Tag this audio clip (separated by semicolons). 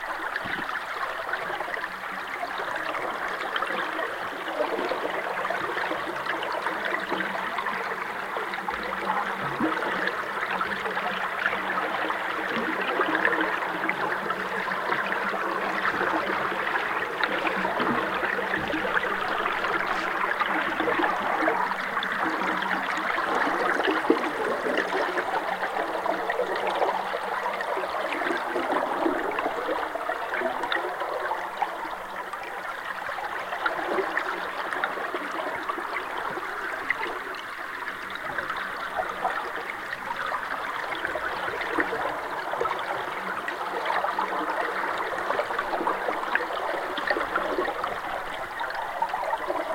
hydro; hydrophone; Leipzig; maelstrom; noise; noisy; river; rush; splash; stream; submerged; under; water; weir